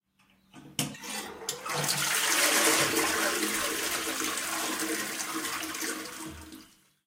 Flushing a toilet
Toilet being flushed
bathroom, Flush, OWI, toilet